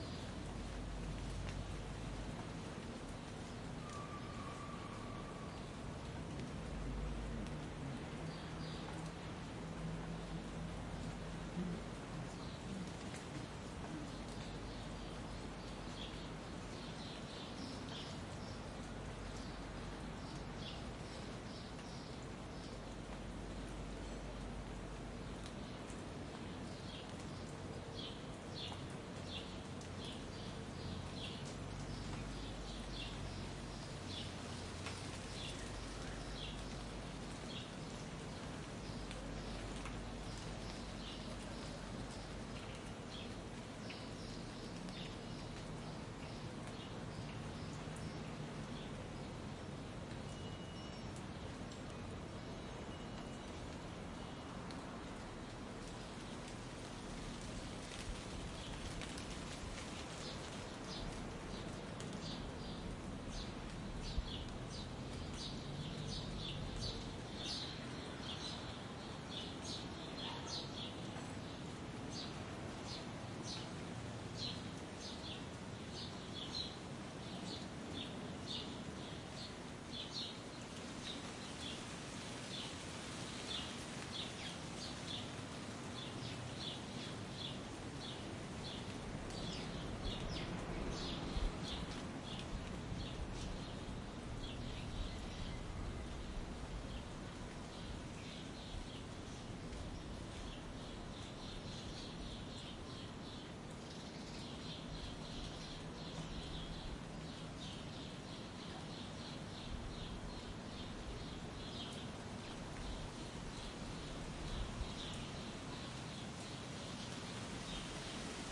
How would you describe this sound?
country day rural drippy after rain +birds Canada1
after, birds, Canada, country, day, drippy, rain, rural